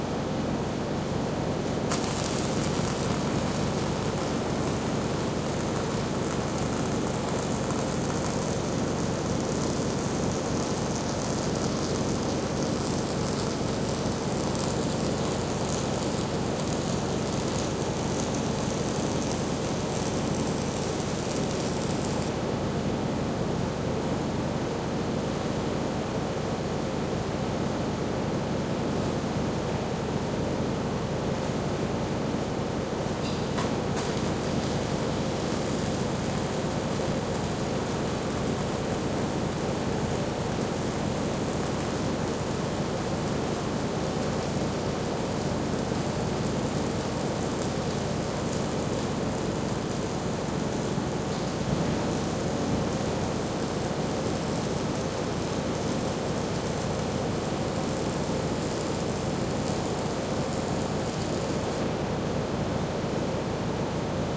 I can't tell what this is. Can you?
Welding Sparks construction power tools with power generator VSNR edLarez
Welding, construction, power tools, sparks, generator in the back, clean recording no dialogue, just welding and sparks with distant power generator ambience in the background.